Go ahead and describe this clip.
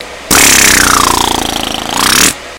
lip oscillation
sound made with my lips
dare-19, lip, oscillation